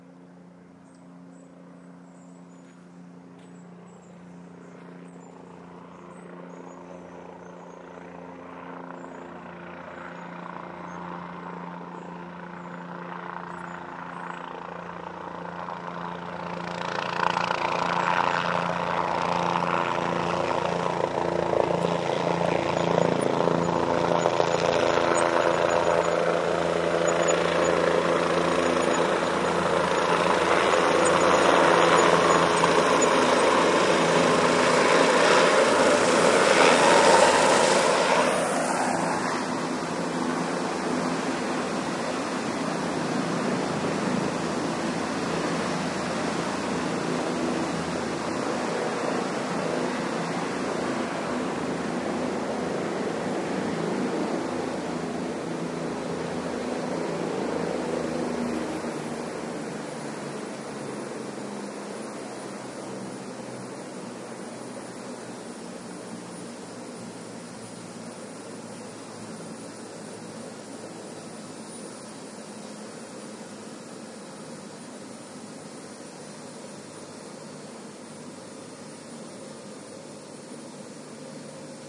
20130402 copter.outside.10
noise of a helicopter approaching, recorded at Peulla (Vicente Perez Rosales National Park, Chile)
helicopter, engine, chopper, rotor